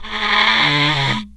blow.nose.02
idiophone, wood, daxophone